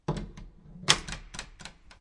Wooden door falling close and jiggling in its lose lock a bit.